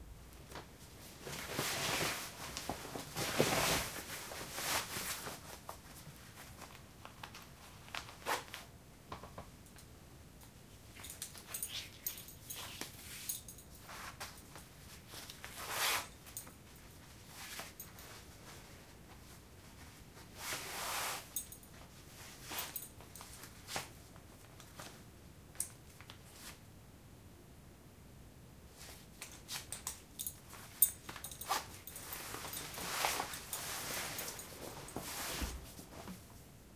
Dressing-jeans-putting-on-belt-undressing-both
Dressing jeans, putting on a leather belt, afterwards undressing both
clothing-and-accessories, belt, dressing, jeans